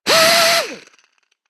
Straight die grinder - Atlas Copco g2412 - Start 1
Atlas Copco g2412 straight die grinder started once.